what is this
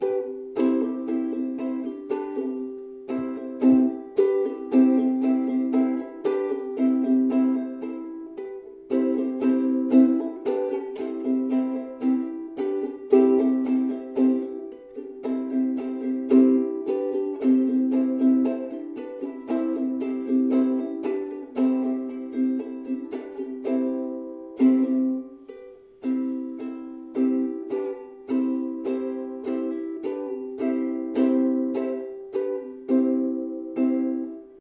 Amateur Ukulele Music Loop
I once played an uke
It sounded okay
I just had to look
At the chords on a page.
This is a calm background melody performed on a ukulele, cut to a seamless loop.
background-music, cheerful, cute, easy, gapless, happy, instrument, listening, loop, music, seamless, simple, small, tiny, uke, ukulele